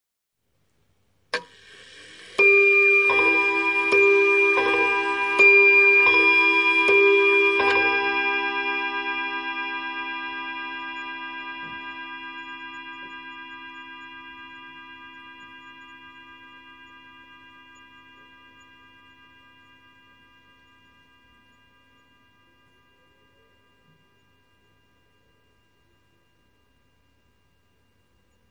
Antique table clock (probably early 20th century) chiming four times.